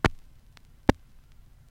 Short clicks and pops recorded from a single LP record. I carved into the surface of the record with my keys and then recorded the needle hitting the scratches.
analog, glitch